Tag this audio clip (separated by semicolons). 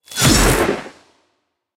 Wizard; Magic; Swing; Sword; Dagger; Lightning; Thunder; Spell; Swish